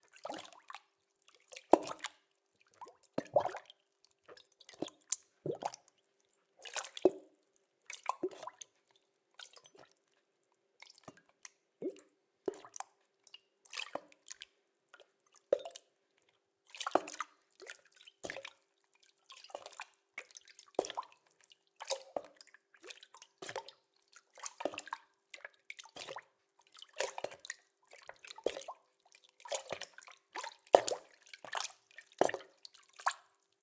FX SaSc Hollow Water Sounds Splashing Splashes Gurgle Small Waves
Hollow Water Sounds Splashing Splashes Gurgle Small Waves